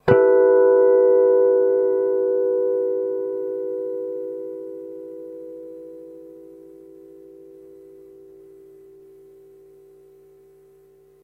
Finger plugged.
Gear used:
Washburn WR-150 Scalloped EMG-89 Bridge